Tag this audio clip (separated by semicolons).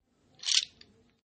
Sticks; Table